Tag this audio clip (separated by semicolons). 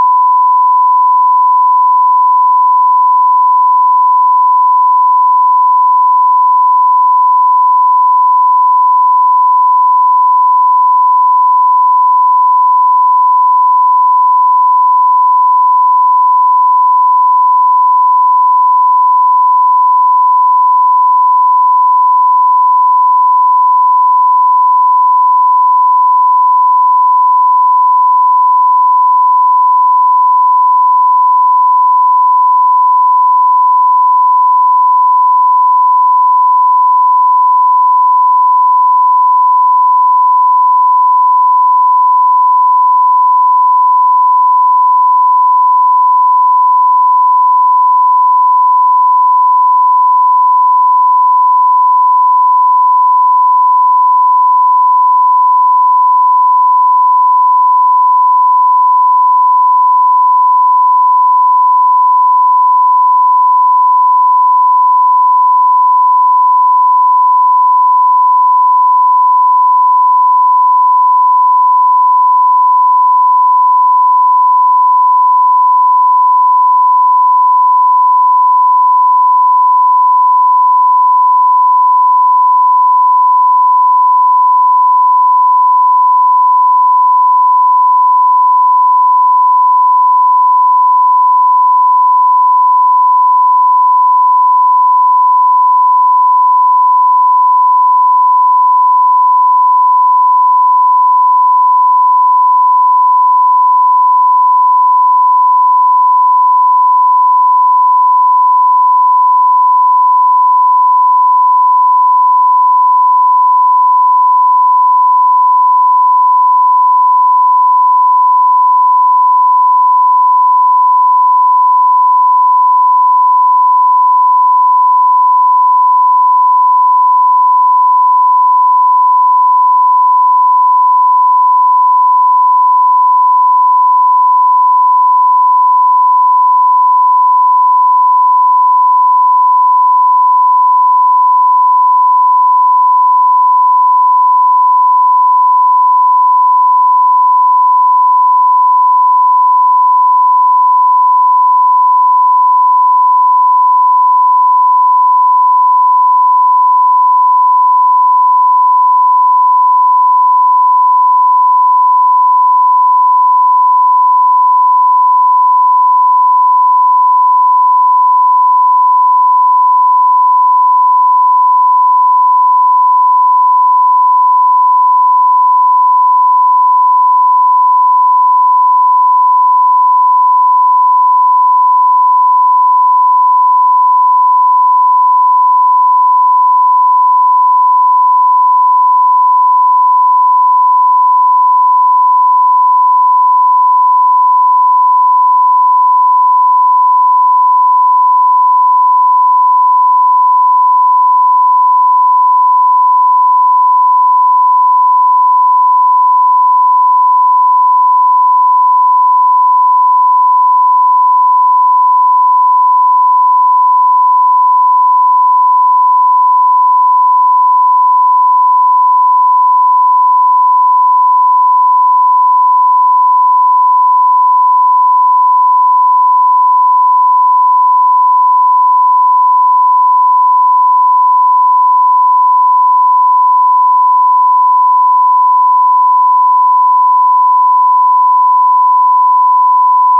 electric; sound; synthetic